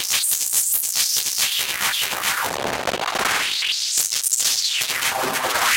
Analog Sandstorm was made with a Triton, and 2 Electrix effect processors, the MoFX and the Filter Factory. Recorded in Live, through UAD plugins, the Fairchild emulator,the 88RS Channel Strip, and the 1073 EQ. I then edited up the results and layed these in Kontakt to run into Gating FX.